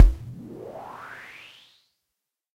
EH CRASH DRUM86
electro harmonix crash drum
crash, drum, electro, harmonix